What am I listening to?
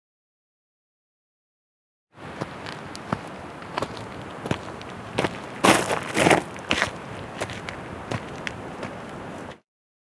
Walking down the mountain river (steps stones)
steps, feet, footsteps, walk, foot, river, stones, walking